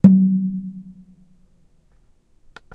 Plasticwaterjug hit
Plastic water jug hit, recording live sample with finger strike
hit percussion drum echo live-sample rhythm percussive percs drum water-drum wood water-hit plastic
drum, echo, hit, live-sample, percs, percussion, percussive, plastic, rhythm, water-drum, water-hit, wood